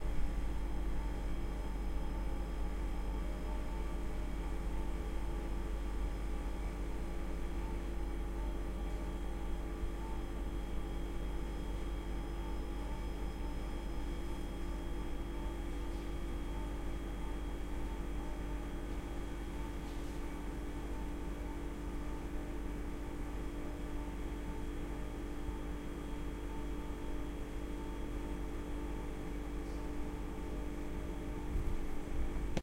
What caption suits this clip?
stationair ijskast LR
perfect recording of a stationary fridge, recorded with a zoom 4N in a very silent room in a monastery in Limburg, Holland.